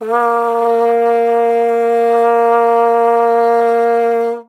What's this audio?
Low note (A#) of a plastic vuvuzela played loud.
microphone used - AKG Perception 170
preamp used - ART Tube MP Project Series
soundcard - M-Audio Auiophile 192